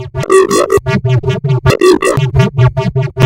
marion herrbach05
description de base: son généré sur Audacity. Onde carré. Phaser. Wahwah. changement de tempo, vitesse...
typologie: itération variée
morphologie:
-masse: son cannelé
-timbre harmonique: acide pour les aigus et brillant par la tension
-grain: basse effet rugueux, en alternace avec son haut plus lissé
- allure: chevrotement régulier, vibrato des basses
-dynamique: attaque douce mais variation abrupte
- profil mélodique: variation scalaire, effet de montagne russe, effet coupant
-profil de masse: filtrage différent selon les passages